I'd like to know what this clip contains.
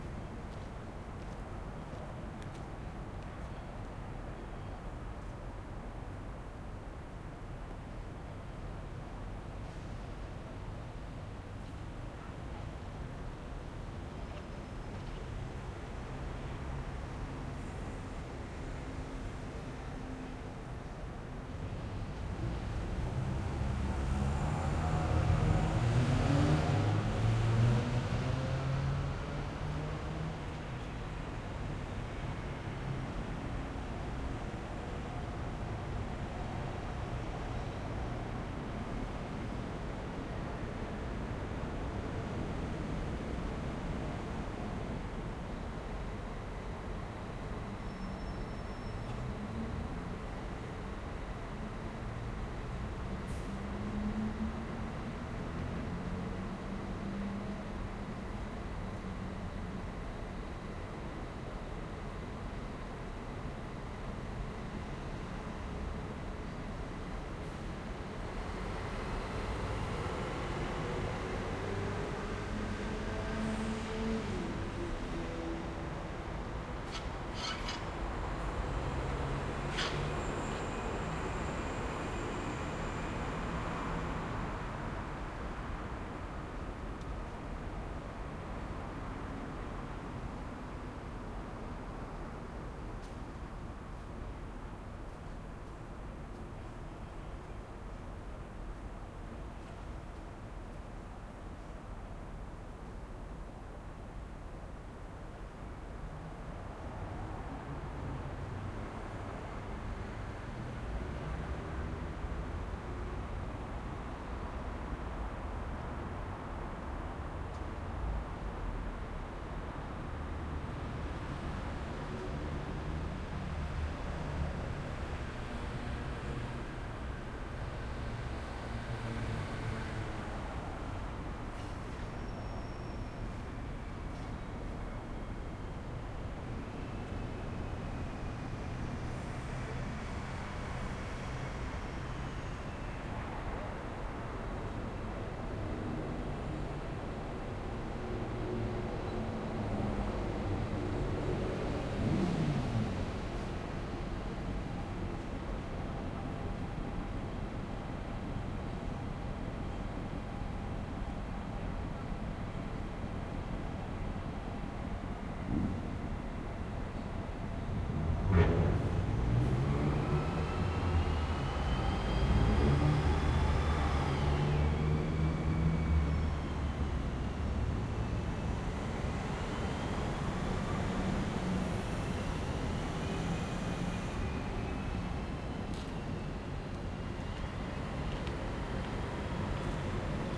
Ambience Urban Night Plaça Primavera
Urban Night Ambience Recording at Plaça Primavera, Sant Andreu Barcelona, August 2019. Using a Zoom H-1 Recorder.
Night, SantAndreu, Ambience, Outdoor, PlPrimavera, Urban